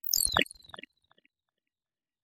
Hello world chirp!
A home-made chirp, just to say hello.
soundeffect, digital, laser, chirp, electronic, experimental, sci-fi